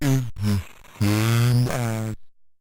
is the same as 1
The words "Is the same as" - - circuit bent from a child's teaching aid
glitch, bent, circuit, vocal